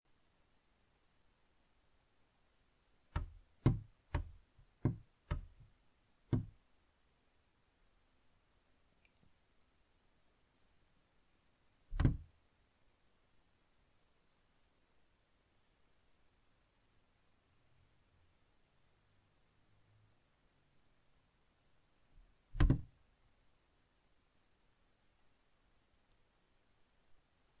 sonido pasos
free sound, efects sonido de pasos en pasillo